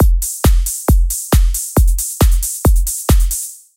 TR LOOP 0301
clubtrance, goatrance, loop, psy, psytrance, trance